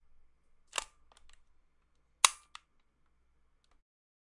sony mini cassette player play button sound